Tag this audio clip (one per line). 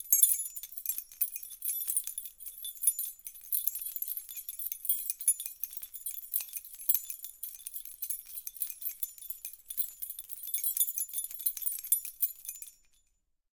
egoless
shaking
sounds
0
chimes
vol
key
natural